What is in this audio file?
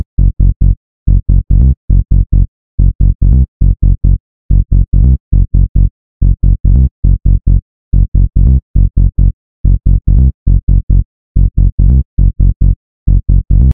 one more bassline